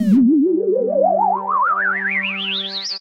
sonokids-omni 03
abstract, analog, cartoon, comedy, electro, electronic, game, lol, sonokids-omni, sound-effect, space, spaceship, synth, synthesizer